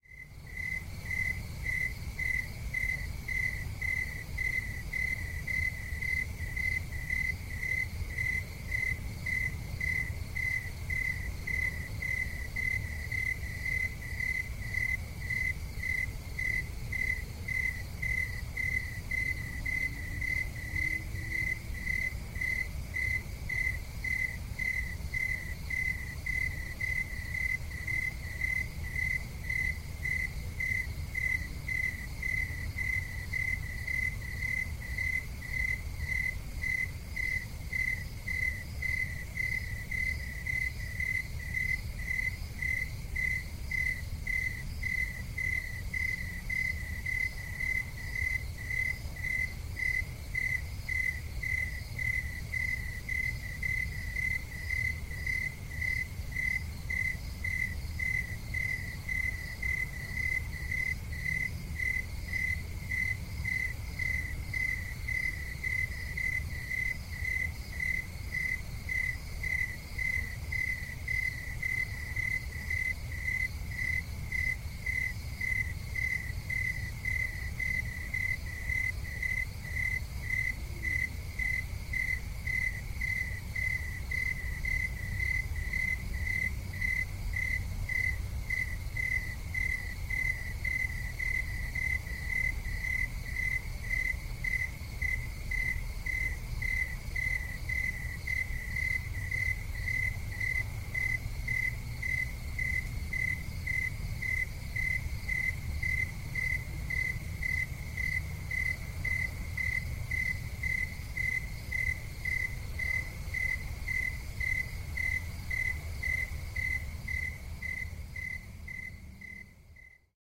City-night-crickets
Recorded in the fields of Georgia, on an iPad using an Audio Technica boom mic.